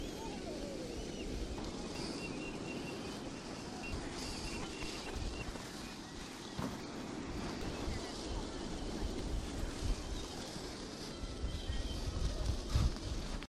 newjersey OC beachsteel snipsmono
10th Street beach in Ocean City recorded with DS-40 and edited and Wavoaur. The whistling sound in the background is from the kite string tied to sign nearby.